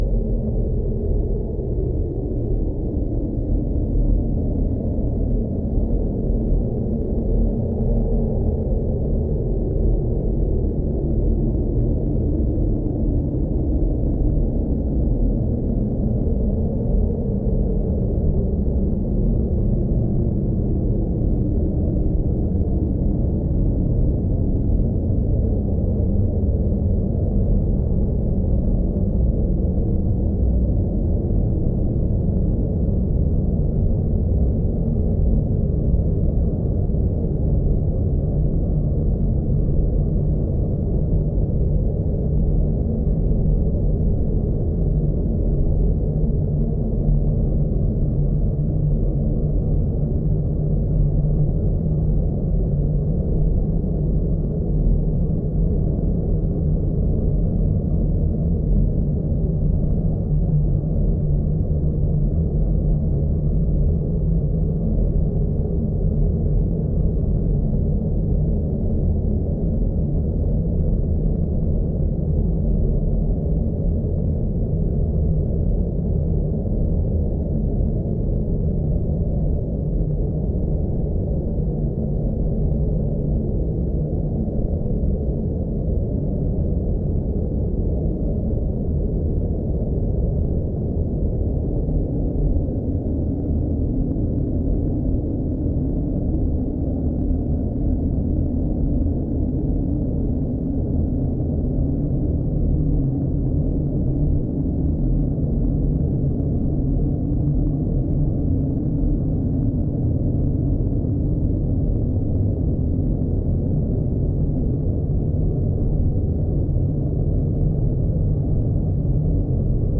Low frequency distant drone
distant, drone, low-frequency